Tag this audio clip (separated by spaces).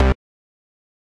bass lead nord synth